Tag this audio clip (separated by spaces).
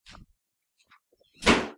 bathroom bathroom-door foley door